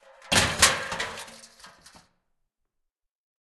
closing the metallic cap at a garbage can